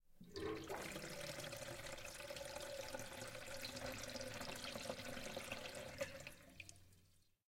Recording of draining water through a sink tubes.